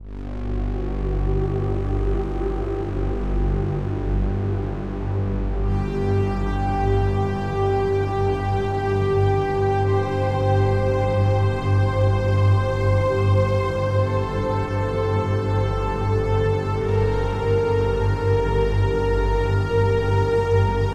stk sound design,omnisphere vst
ambient, noise, deep